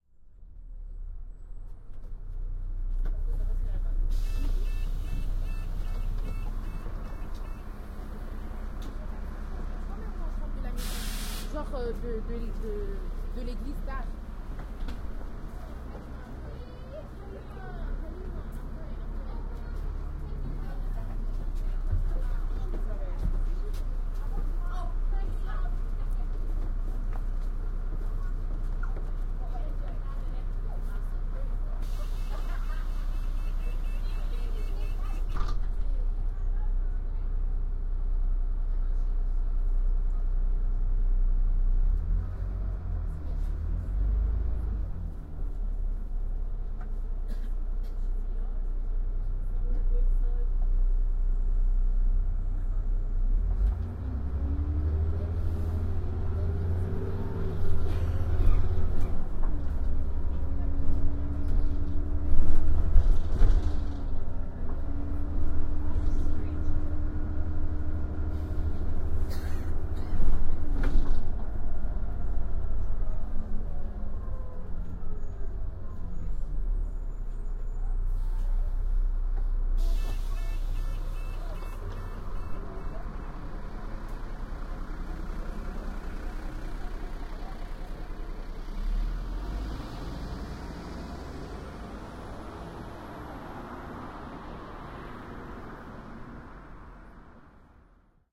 London bus, traffic, doors openings, announcement 2013